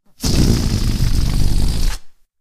balloon deflate
A balloon deflating, recorded with a Rode microphone.